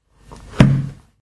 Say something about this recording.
Closing a 64 years old book, hard covered and filled with a very thin kind of paper.
loop, lofi, household, book, percussive, noise, paper